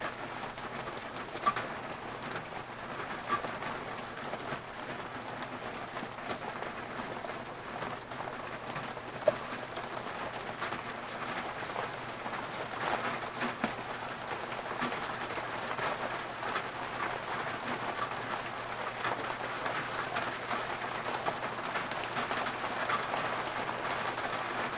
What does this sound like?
January rain on a roof
Just a short recording of rain hitting the roof of the attic of my studio. Done as a thank you for the sounds others have given away here.
indoor, raining, water, rain, raindrops, dripping, drip, drops, shower, weather, roof